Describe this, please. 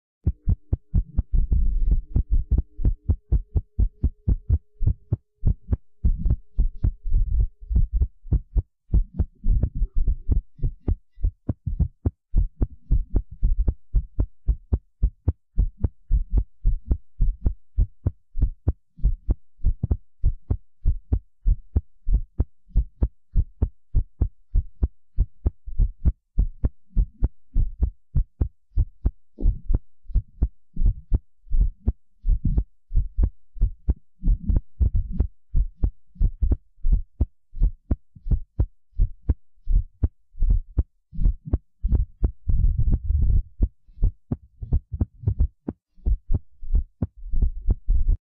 Irregular fast heartbeat
recorded with a commercially available fetal heartbeat monitor, placed on a young female adult who has heart palpitation issues after rigorous exercise.
monitor, irregular, heart